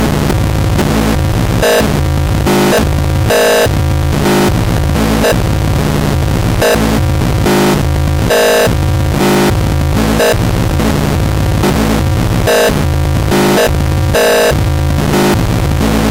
Square Malfunction
Random modular square wave sequence. Machine malfunction.
CMOS, element, digital, malfunction, synth, modular, Noisemaker, glitch, production